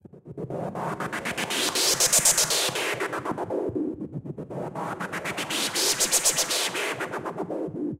Gated FX 120BPM
Gated Fx sequenced out of Gladiator, processed in ableton live.
120bpm, ableton, crisp, electronic, gated-fx, gladiator, loop